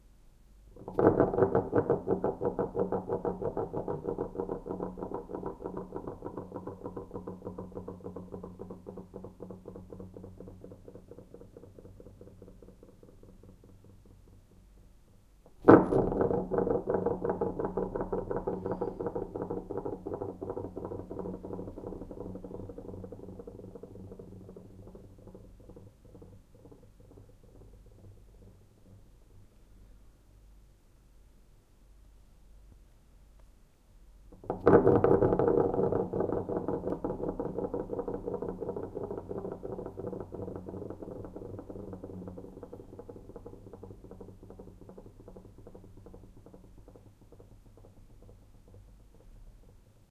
the noise of one pebble rolling rhythmically on another. PCM M10 recorder, internal mics